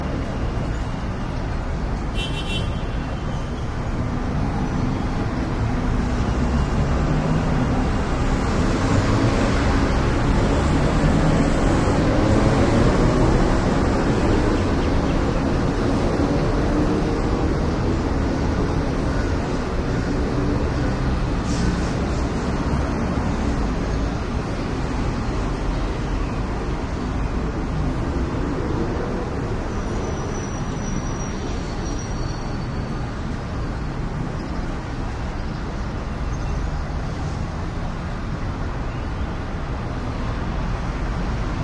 Thailand Bangkok traffic heavy intense large city square wide perspective echo cars and motorcycles +bird unfortunately